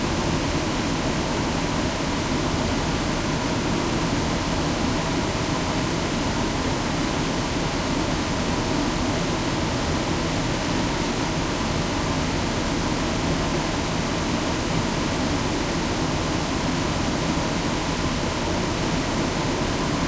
Recorded during a 12 hour work day. Testing different filters and how it affects recordings.